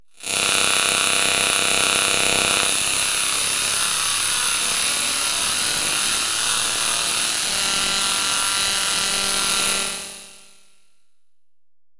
Granulated and comb filtered metallic hit
comb, grain, metal